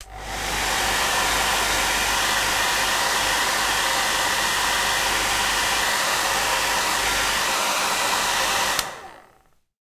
hairdryer nopopshield
Hairdryer recorded without pop shield (more bassy with bass wind noise).